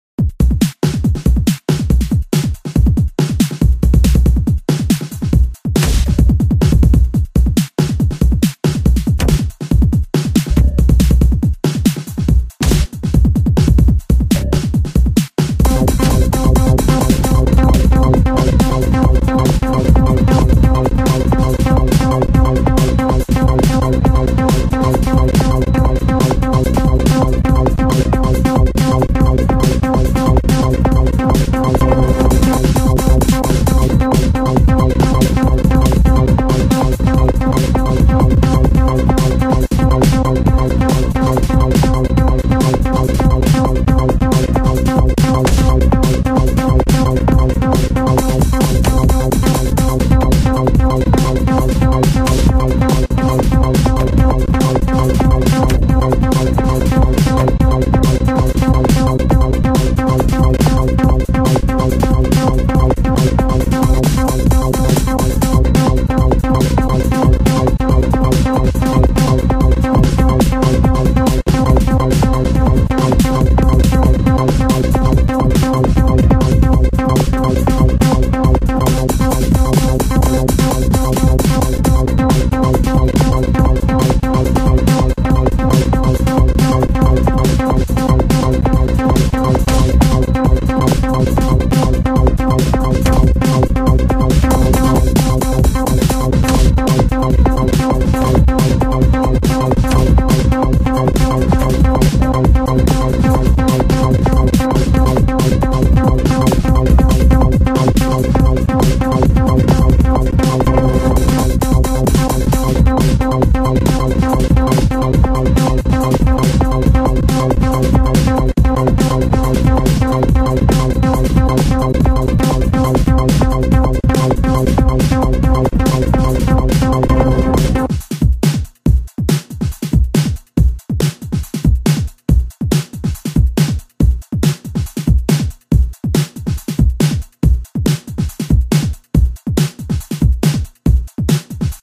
bomm boom
beat, hip, hop, rap, RB, sample, song, souldancediskostreet